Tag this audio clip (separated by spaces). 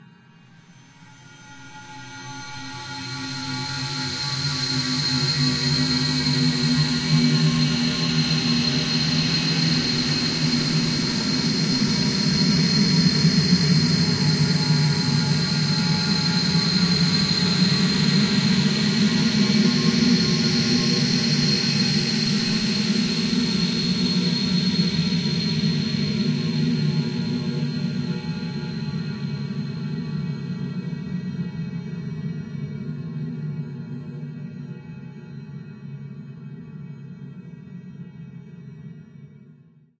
multisample space cinimatic dusty soundscape